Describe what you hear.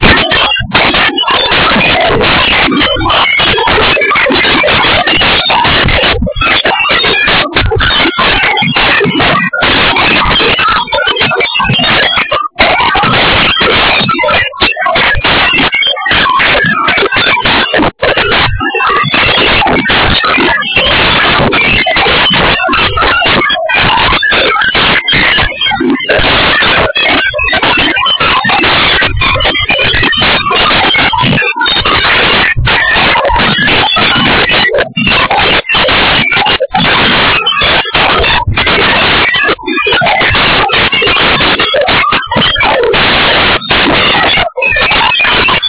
tuning an alien radio